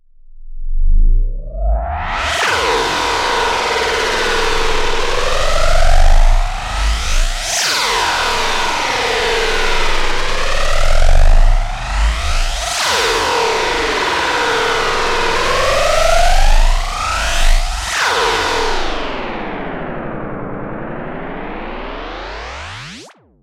Alien Boomerang 03
24_48-Some experimentation with various plugs produced this noise.
alien, design, drone, experiment, sound, synth, synthesized, texture